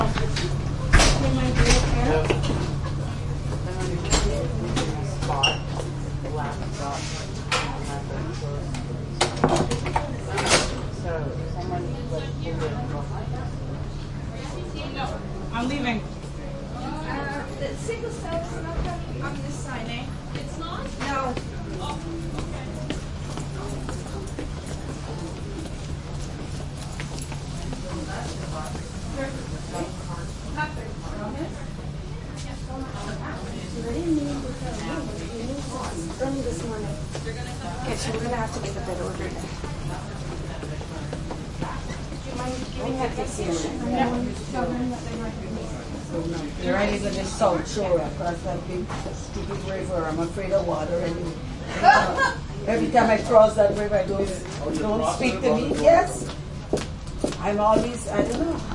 hospital hall4 calm with heavy ventilation +voices people pass close to mic Montreal, Canada
calm, Canada, hall, heavy, hospital, Montreal, ventilation